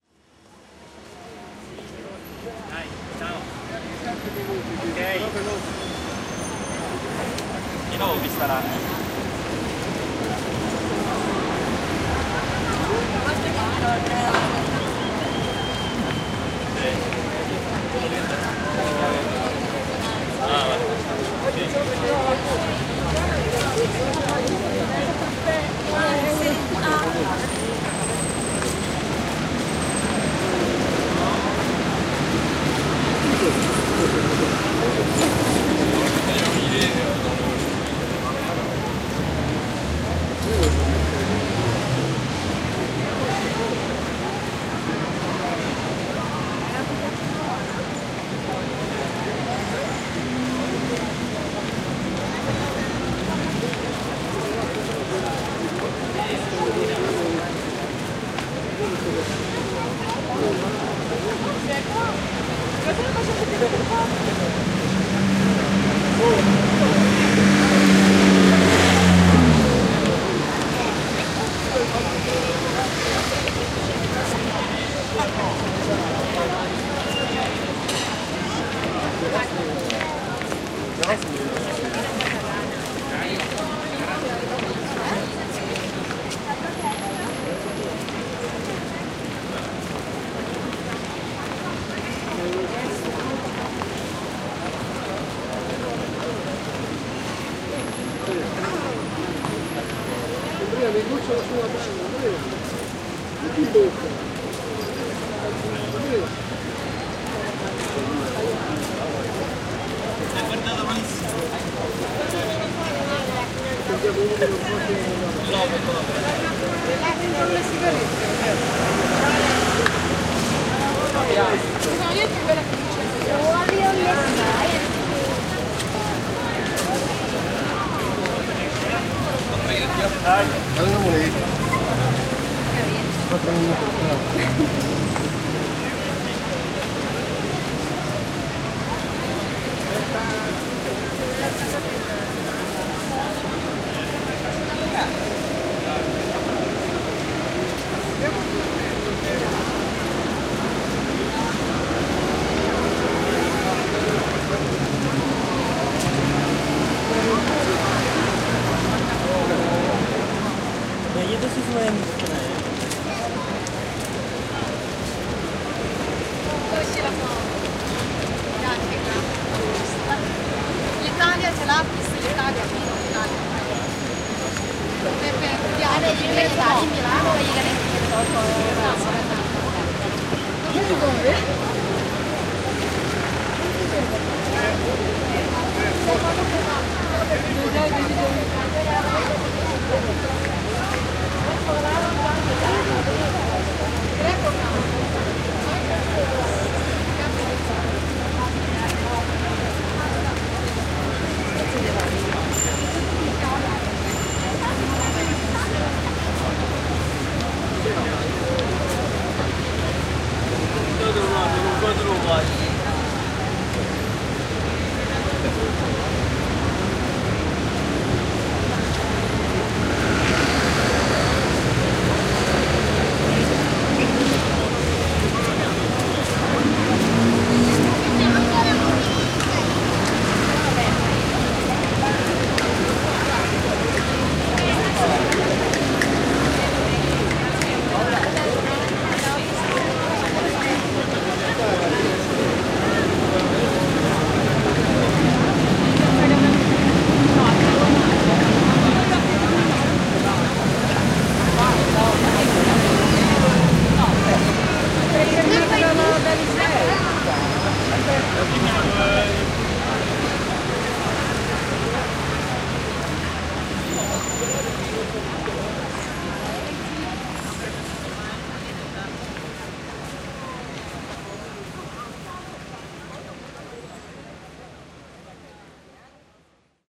Las ramblas
Point of reference for the life of Barcelona. Also for tourists visiting the city. Huge din of big city. It seems dense traffic, but there's just one travel lane in each direction. And bettween the sounds of traffic, people: people going from top to bottom, without stopping, infinite, in a continuous boil and with intonations of different languages: Italian, German, English, Arabic, African ... Flowing of human activity in one of the bet known walking areas in Barcelona. Overwhelming. Ah! and of course, bikes, everlasting in Barcelona, with their bells announcing their coming.
barcelona, ramblas, soundscape